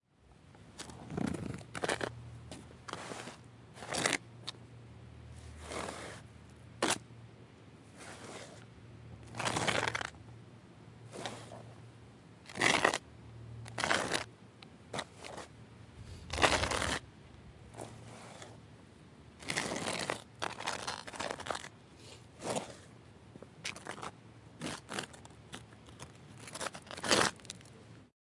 pas zombie route
gravel, steps, walking, zombie